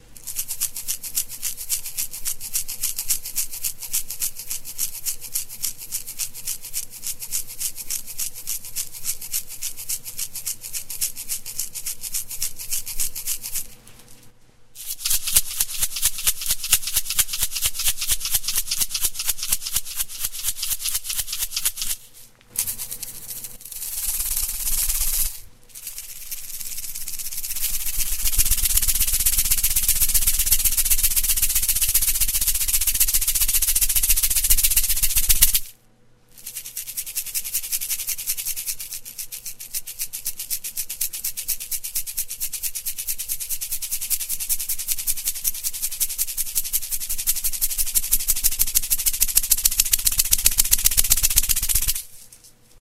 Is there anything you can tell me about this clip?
grabacion de un salero. Field-recording of salt
sal, salero, salt